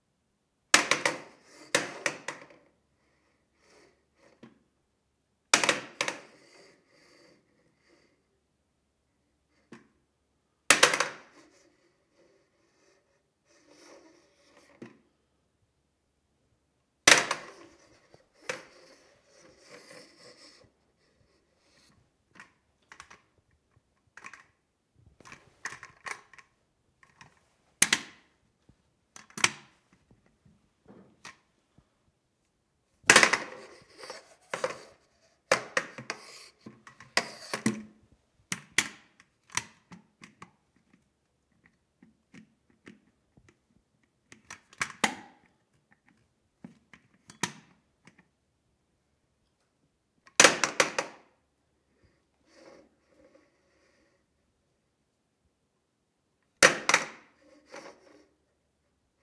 Aluminum metal walker cane, hit drop impact on bathroom tile floor
Aluminum walker dropping on tile
walker, wood, tile, drop, hit, cane, aluminum, crutches, metal, impact, metallic, bathroom, steel, floor